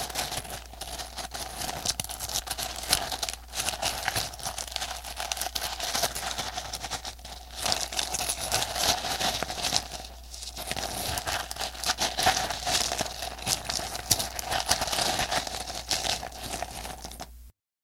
Stirring dry snack mix OR "gravel"
"Old woman stirring S'mores Goldfish snack in a kid's cup with a kiwi spoon."
Can be used to convey rolling or driving over gravel, stirring pebbles, digging rocks, or stirring a snack mix in a plastic cup.